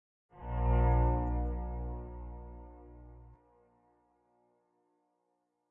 string section
It samples String Quartet No. 12 in F Major, Op.